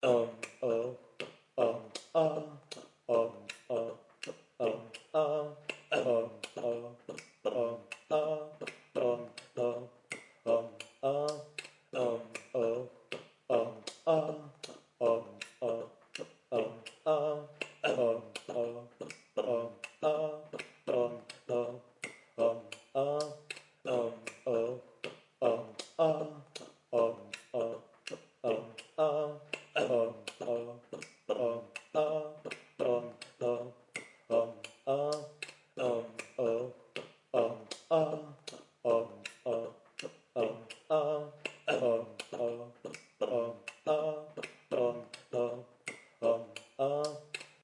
dum ba dum
Me babbling some rythim I got in my head.
Microphone on a Canon 50D.
loop, box, ba-dum, beatbox, beat, Dum, rhythm